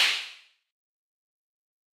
SwarajiwaTH Open Hihat
Open hi-hat
hi-hat, hihat, synth1